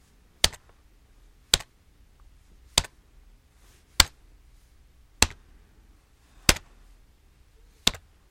a button being pressed
press, click, button, foley, keyboard